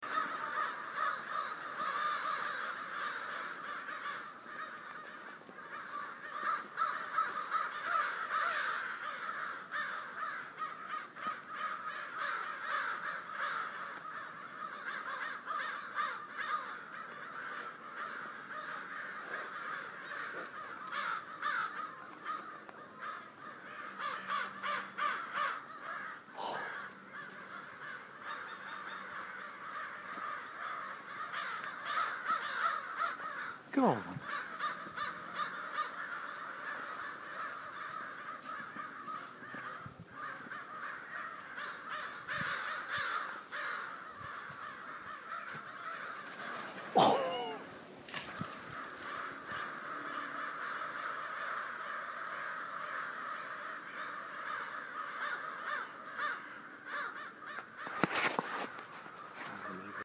Bosque crows
Crows gather in huge numbers in the Bosque around Albuquerque
birds, crows, birdsong, forest, field-recording, nature, bird